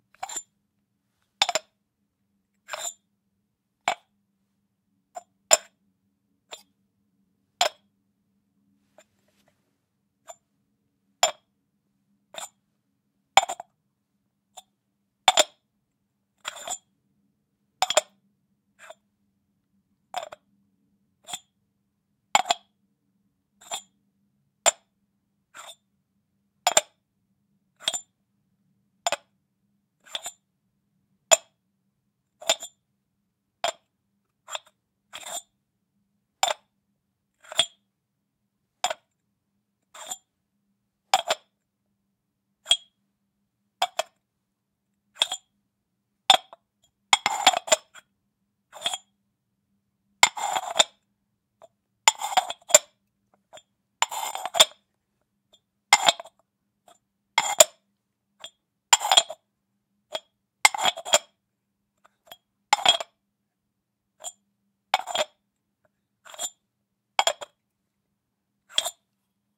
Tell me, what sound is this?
ceramic pot small clay jar lid open close
ceramic, clay, close, jar, lid, open, pot, small